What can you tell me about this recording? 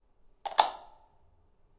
toothbrush
ZOOM H6
bathroom, toothbrush, water